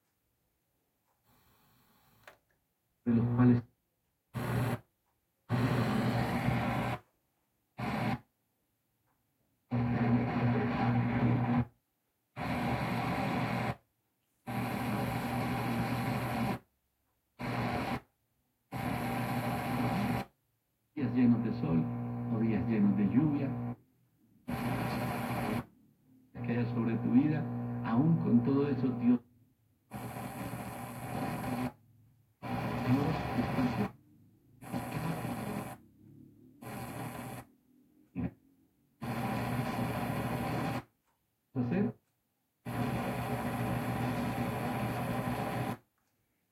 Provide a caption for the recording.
Sonido característico presente al intentar sintonizar una radio en una emisora.